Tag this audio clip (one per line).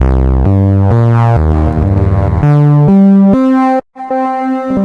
120BPM
ConstructionKit
bass
dance
electro
electronic
loop
rhythmic